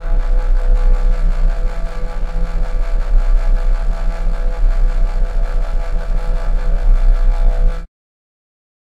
Corentin ANDRÉ SpacePodThrusters OLD
This is an old version, non loopable and was, until now, not licensed properly.
You can find the loopable version under the same name : SpacePodThrusters
Sound designed for a small spaceship in a cartoon.
All processed via VSTi.s and FXs.
Made on 11/05/2018 in Reaper 5.
Have fun !
drive, engine, future, futuristic, fx, machine, motor, sci-fi, scifi, space, spaceship, thrusters, vehicle